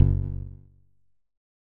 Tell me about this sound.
Percussive Hit 02 08
This sound is part of a series and was originally a recorded finger snap.